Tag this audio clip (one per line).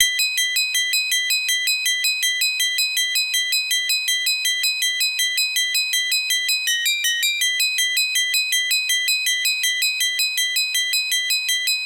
new
Ambient
Listening
breakbeat
Noise
Experimental
NoiseBient
Psychedelic
Dark
Easy